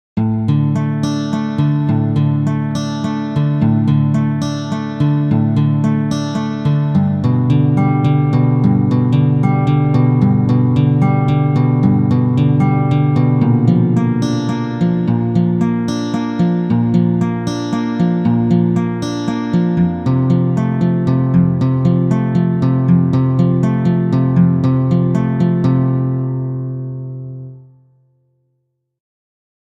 Guitar notes
I made this in Garageband for Ipad. Simple notes.